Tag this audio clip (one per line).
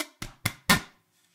0
egoless
natural
noise
scratch
sounds
vol
zipper